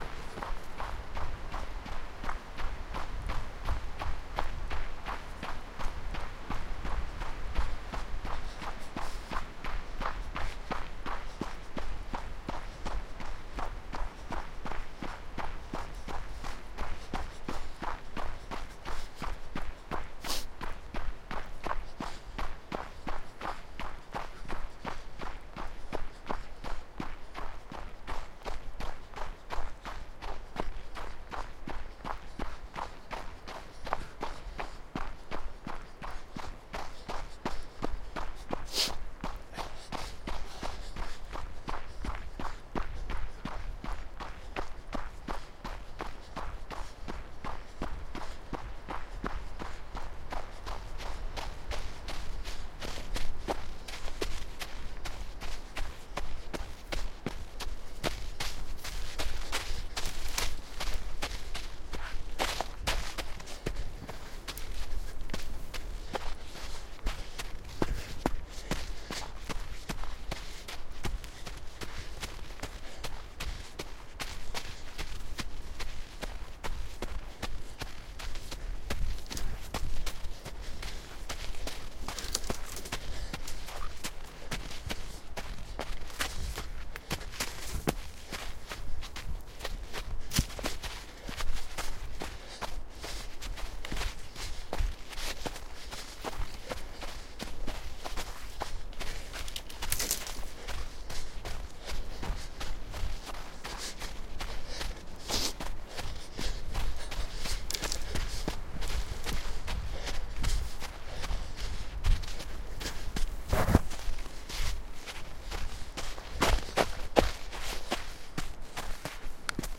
running forest snow
Jogging through a snowy forest at night. First half is on a path, the second half off path and uphill through the trees. Recorded with Zoom H2.
running, footsteps, snow, forest, jogging, field-recording, breath